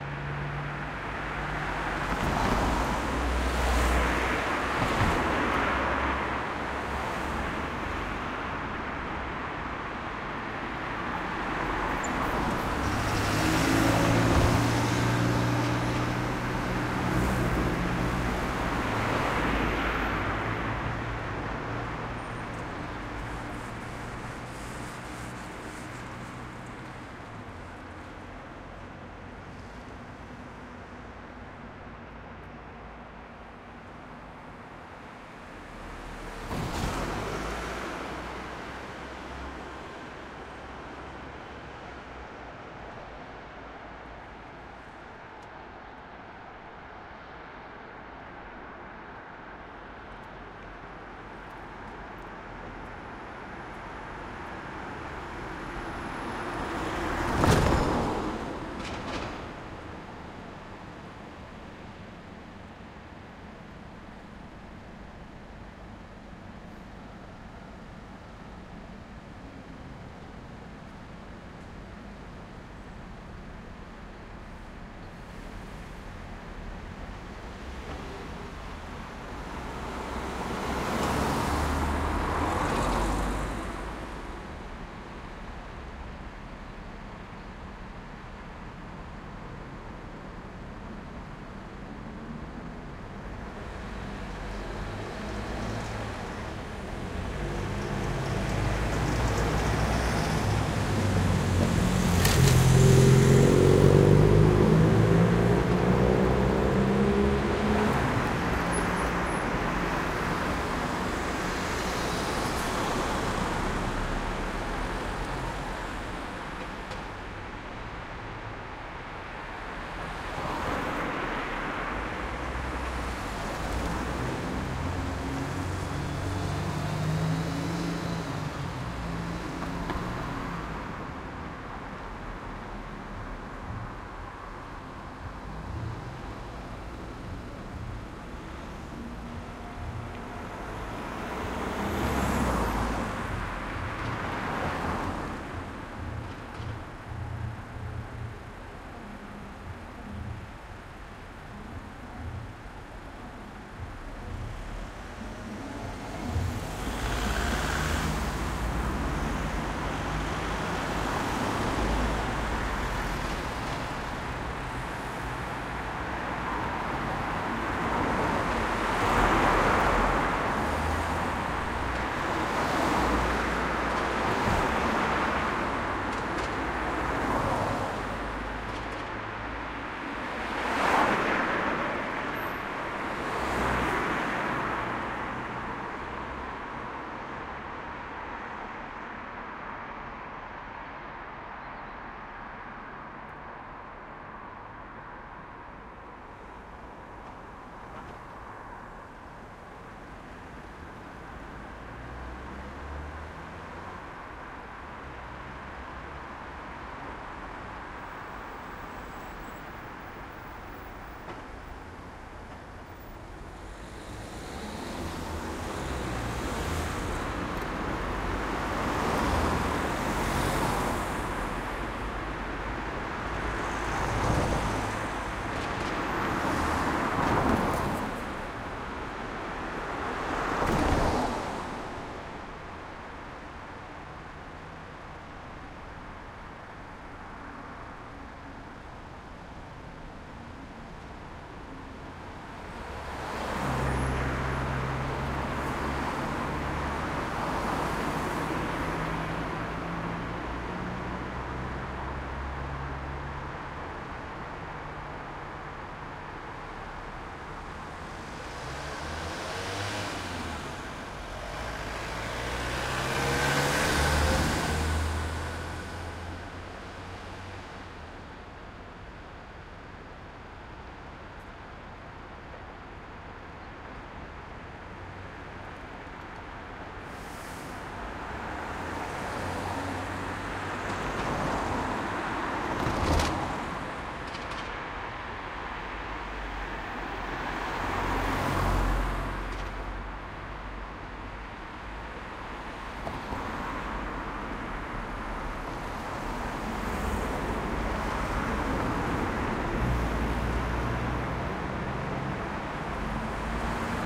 London UK Traffic 2 Feb 2013
This is a stereo recording of traffic ambience in Greenwich, London, UK. This recording is unedited, so it will need a bit of spit and polish before use.
bus, car, city, early, london, morning, road, traffic, uk, urban, van